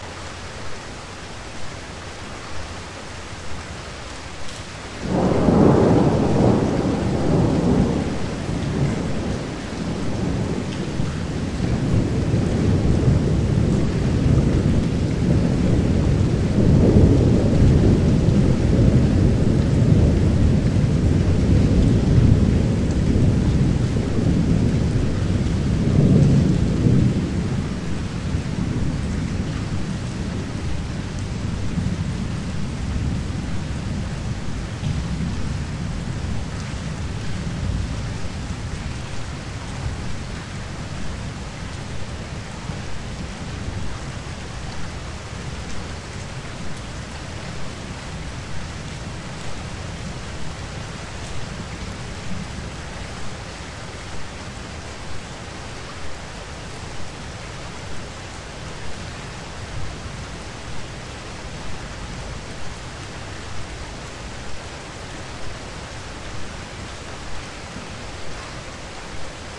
Thunder and roll during a rainfall in Berlin, Germany 2013.
Recorded with Zoom H2. Edited with Audacity.
thunder, urban, rain, thunder-roll, strike, lightning, bad-weather, raining, city, wet, weather